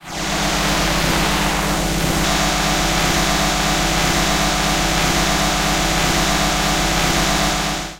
Three ground loops processed